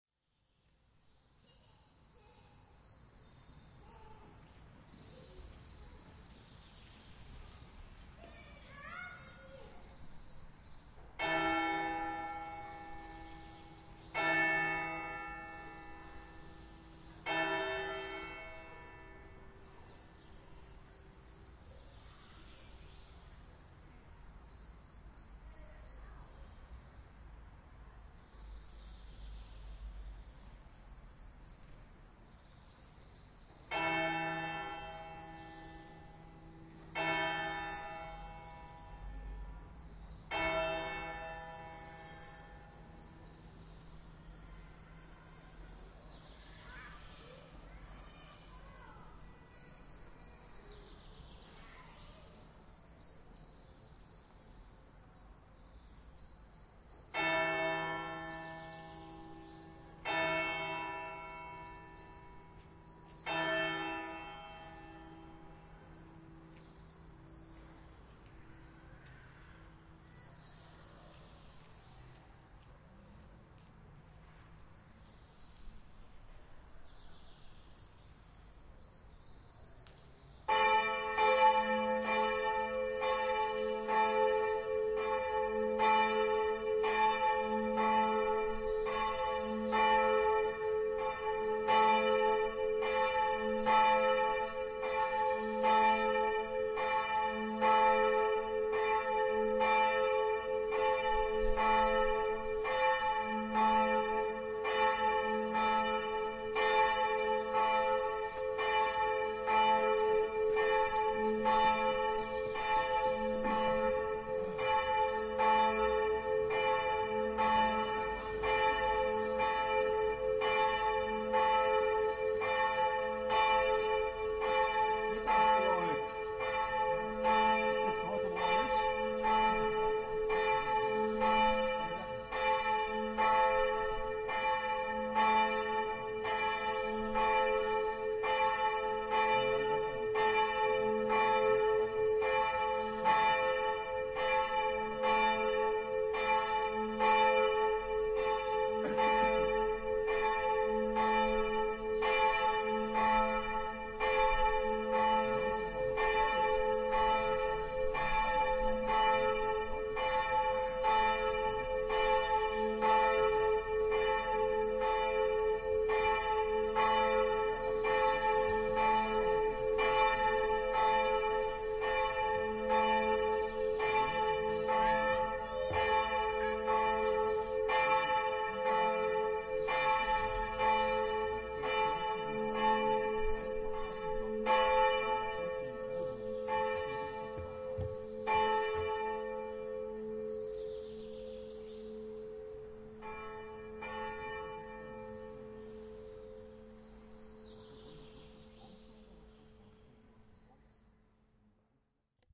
120304-004 church clocks St.Anna
Church clock of St.Anna in the quarter of Ehrenfeld, Cologne, striking at noon. There are three times three strikes one after the other, then continuous striking. Zoom H4n.
noon, clock, church, ehrenfeld, cologne